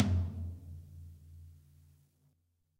Middle Tom Of God Wet 002
drumset, tom, middle, pack, realistic, set, kit, drum